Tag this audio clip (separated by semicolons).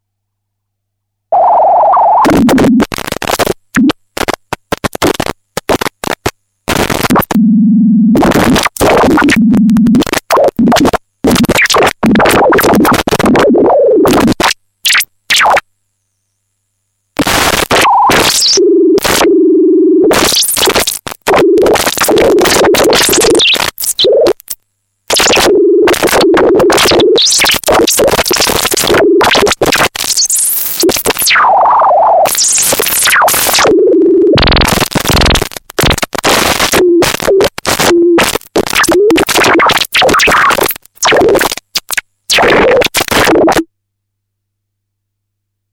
MC-202; Roland; error; glitchy; Analogue; 202